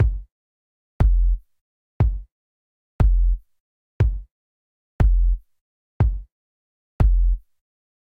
minimal drumloop no snare
acid, beats, club, dance, drop, drumloops, dub-step, electro, electronic, glitch-hop, house, loop, minimal, rave, techno, trance